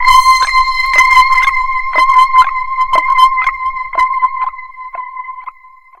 THE REAL VIRUS 14 - HEAVYPULZLEAD - C6
A pulsating sound, heavily distorted also, suitable as lead sound. All done on my Virus TI. Sequencing done within Cubase 5, audio editing within Wavelab 6.
distorted, multisample, pulsating